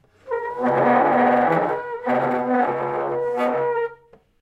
wooden.chair.01
that awful sound made when you dragg a wooden chair. RodeNT4>Felmicbooster>iRiver-H120(Rockbox)/el sonido horrible de una silla de madera cuando se arrastra por el suelo
wood, dragging, household, chair